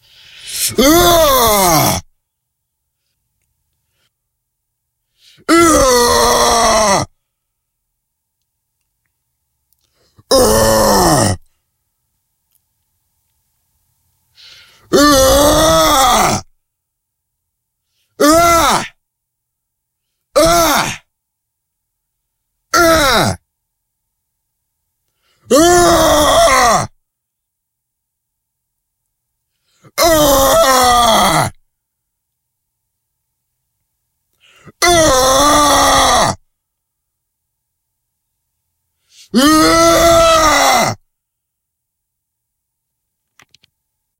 Male Aggressive Growls
I really hurt my throat doing this :P
here is several growling sounds recorded by me
Recorded with Sony HDR-PJ260V then edited with Audacity
growl, loud, voice, rock, monster, metal